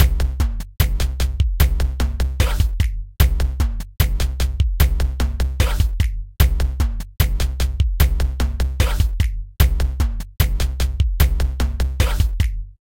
beat6-75bpm
75 bpm key unknown.
75, 80s, beat, drum-loop, island, rhythm, tribal, tribe, weird